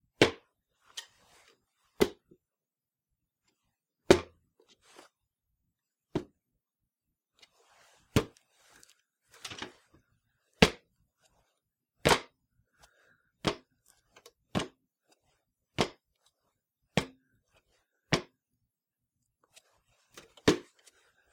Footsteps-Step Ladder-Metal-01
This is the sound of someone stepping/walk in place on a metal step ladder. It has a sort of flimsy metal walkway sound too it.